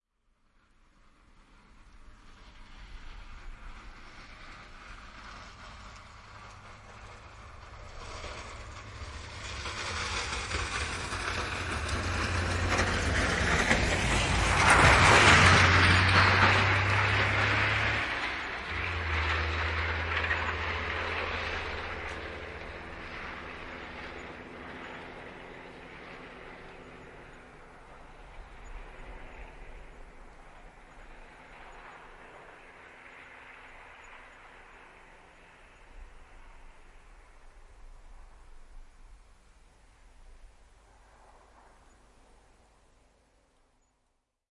Tietä aurataan, lumiaura menee ohi, aura kolisee. Kuorma-auto Sisu.
Paikka/Place: Suomi / Finland / Vihti
Aika/Date: 18.03.1976